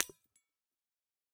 Small glass holiday ornament shattered with a ball-peen hammer. Bright, glassy shattering sound. Close miked with Rode NT-5s in X-Y configuration. Trimmed, DC removed, and normalized to -6 dB.